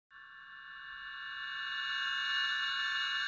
Device Emitting Light Short
Medium, high pitch resonating hum.